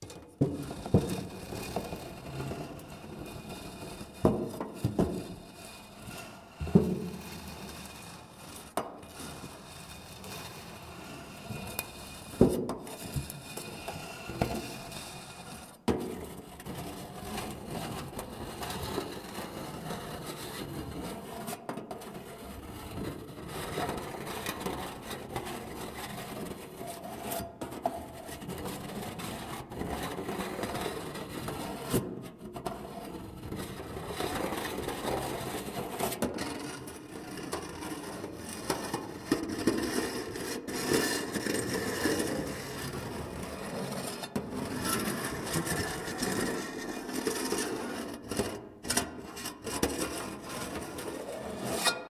elevator moving scraping metal sliding
sounds recorded on zoom h2n, edited in audacity.
descending, friction, grinding, lift, metallic, scraping, scuff, slide